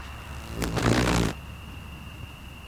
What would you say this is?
20060628.night.beetle
the buzz of a beetle attracted to my flashlight in a hot summer night, with crickets in background. Recorded in Mediterranean scrub. Sennheiser ME66 > Shure FP24 > iRiver H120 (rockbox)/ ruido de un escarabajo nocturno atraído a mi linterna una noche de verano
beetle, nature, summer, night, field-recording, insects, donana